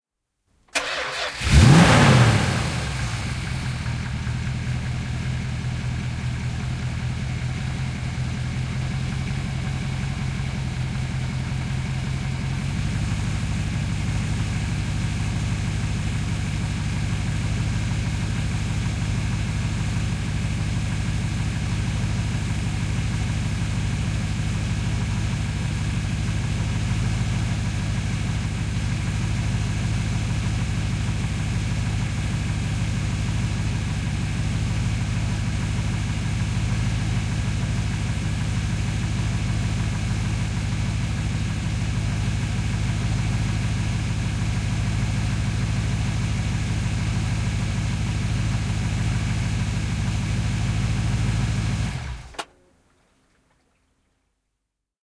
Henkilöauto, tyhjäkäynti, sisä / A car, start, idling, engine shut down, interior, Dodge Charger 471 V8
Dodge Charger 471 V8. Käynnistys, tyhjäkäyntiä, moottori sammuu. Sisä.
Paikka/Place: Suomi / Finland / Vihti
Aika/Date: 09.09.1979
Autoilu, Cars, Finland, Finnish-Broadcasting-Company, Idling, Suomi, Tehosteet, Yleisradio